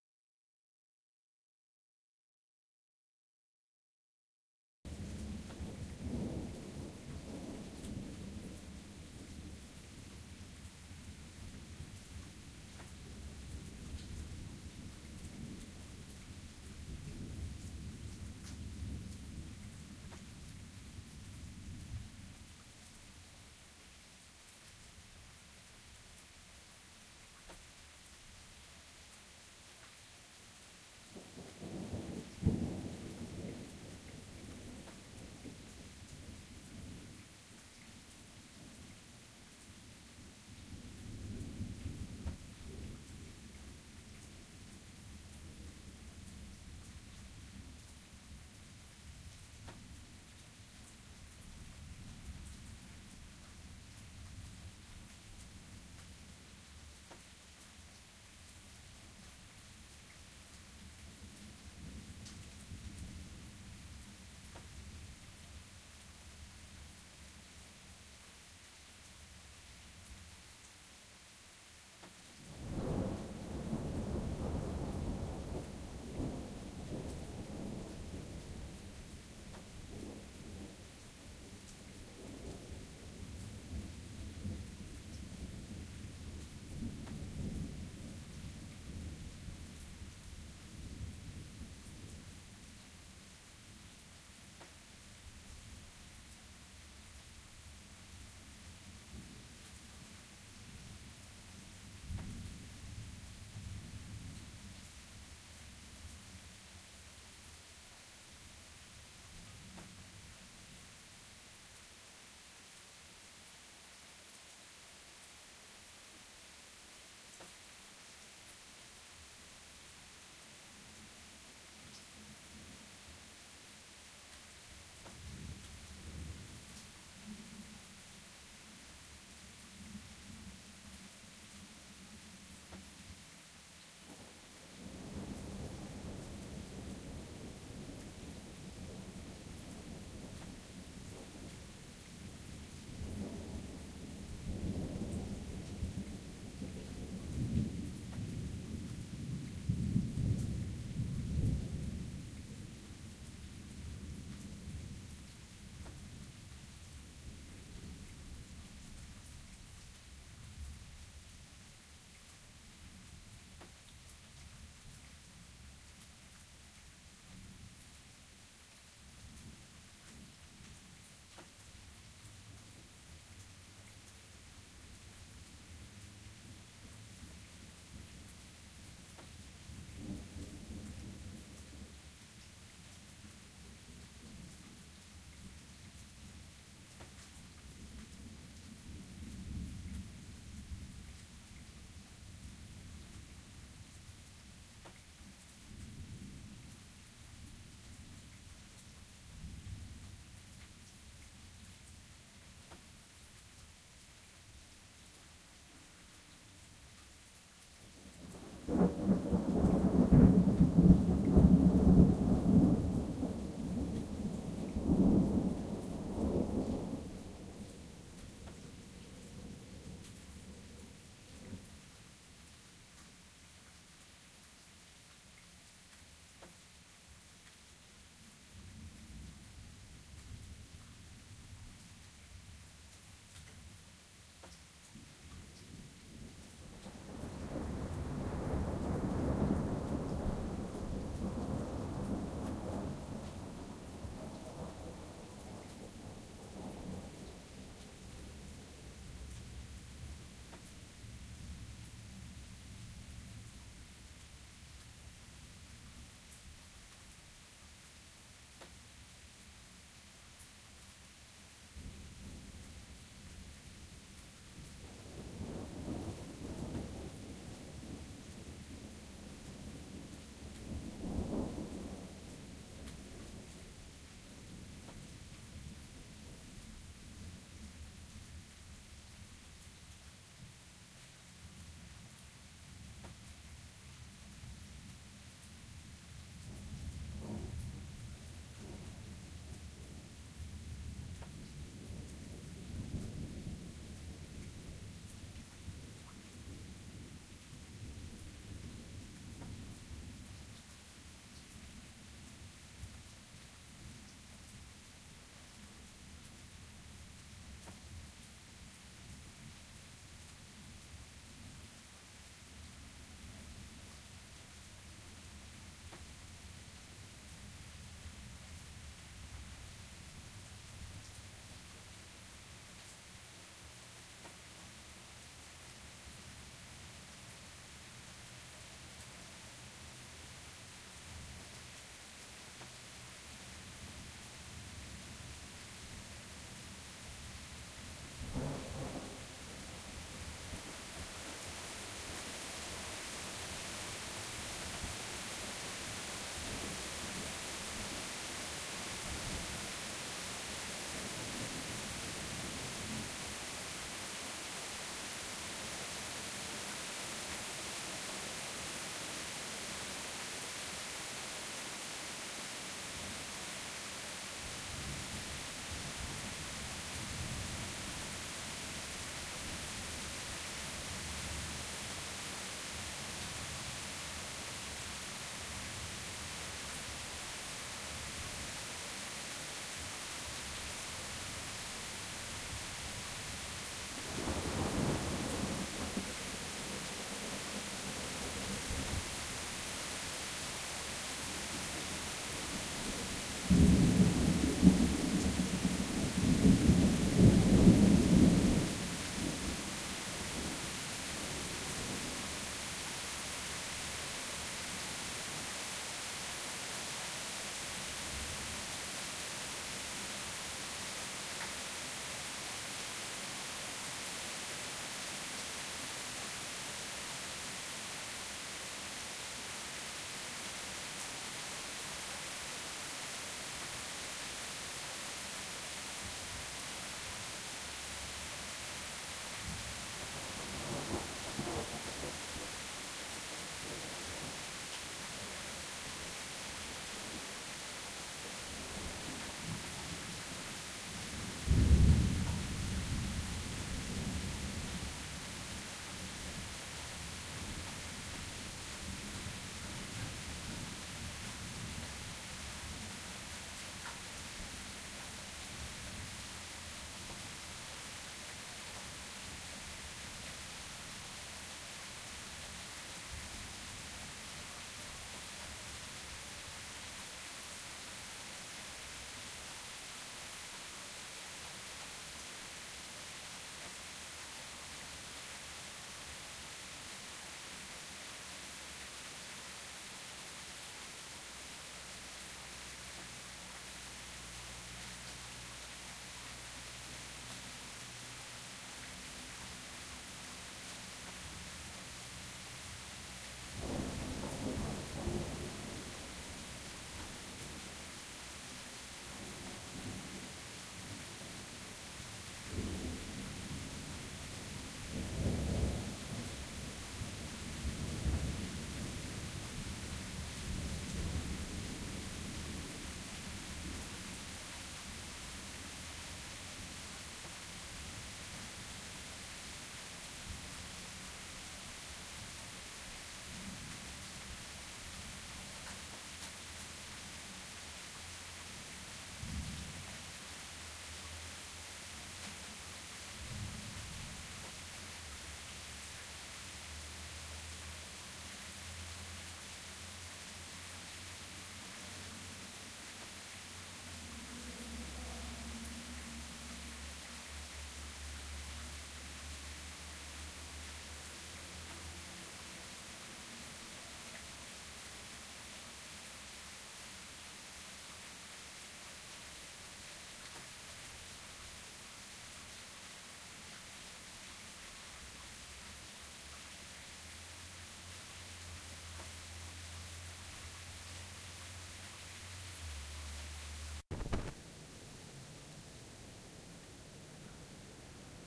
well.....field recording is a bit of a stretch This was recorded on my back porch in Springfield MO USA in June of 2000. I used a minidisk and two PZM's and a home made spliter box that worked only if you never touched it. It was one in the morning to try and avoid most of the traffic. Rain starts about half way in. Enjoy
field, rain, soft-thunder, recording